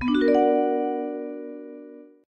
A noir-style vibraphone chord that might play when you find a clue or crack the case.
ambience, mystery, noir, sound-effects, suspense